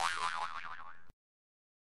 I sampled a single note, which then fed OK into a midi keyboard, allowing me to effectively play Jews harp melodies. The result was pretty OK
jaws, harp